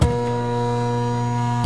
noisy printer sample, that i chopped up for a track of mine, originally from..
user: melack
energy, electronic, mechanical, robotic, printer, machinery